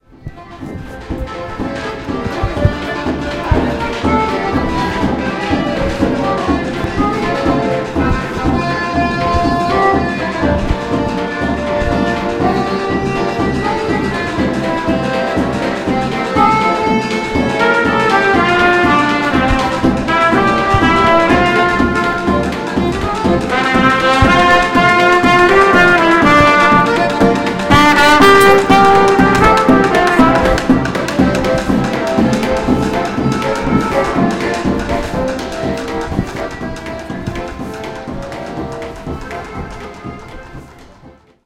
london-tunnel-by-festival-hall-south-bank
A short clip of three buskers playing a Christmas carol. Accordian, drum and, later, trumpet. In a tunnel under a bridge on the South Bank in London between Festival Hall and Tate Modern. Zoom H2, built in mikes.